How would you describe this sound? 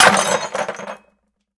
Trashy Smash
breaking a piece of rejected handmade pottery with a hammer into a plastic bucket.